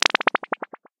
A short sound effect which can be used as a transition or a s a part of a jingle. I created it with white noise filtered with sweeping phaser. I used old cool edit 2.1